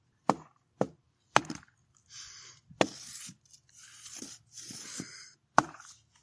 Tree Hit and Scrape
Tree being hit and scraped by an object. Dull thuds. Cleaned in Audacity.
hit, impact, scrape, thud, tree, wood